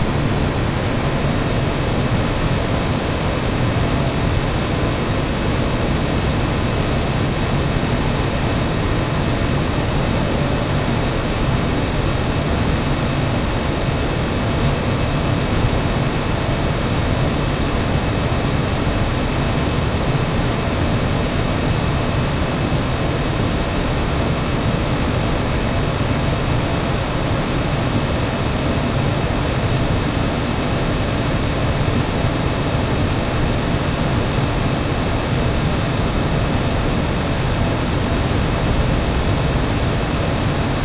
fan helsinki socispihavalko
One in a collection of fans, all in the same back yard. This one has a very interesting scrambling pattern. Among my other fan sounds you can find other individually pointed recordings of this group of fans. Field recording from Helsinki, Finland.
Check the Geotag!